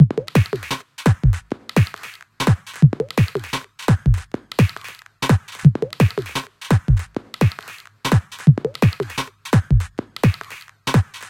Just a compatible Beat for the Msuic Loop "Spacey" also listed in the Track Packs.
I´ve used this in a project in the middle of 2020.
It´s smooth but fast enough to be used in a dnb production
Have fun
Thomas